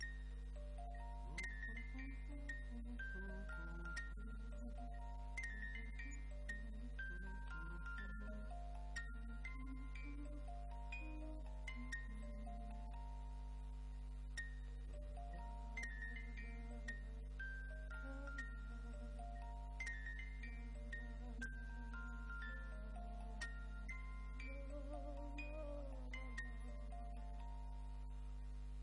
musicBox+humming
My "le Petit Prince" music box and me